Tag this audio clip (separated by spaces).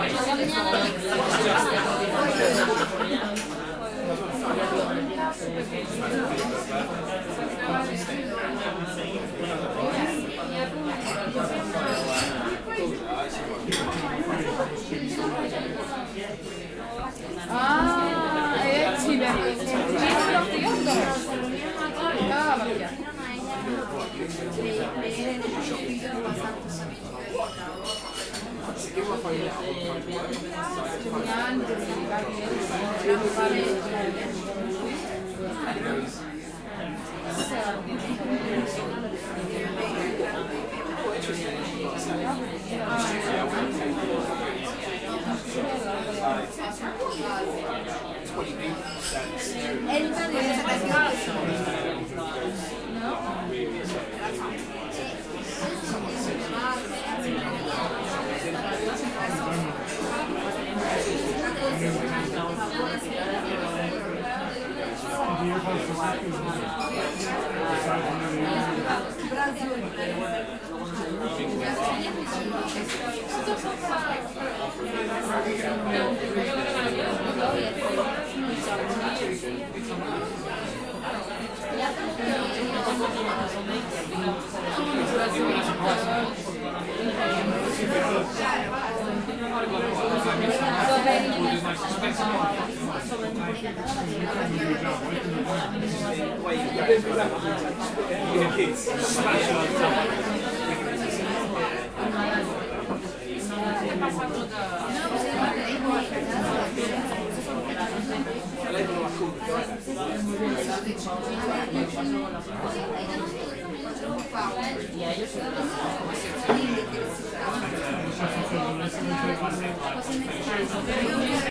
multi-language crowd soundscape background dnr talking college noise people restaurant atmosphere fish-and-chips ambient trinity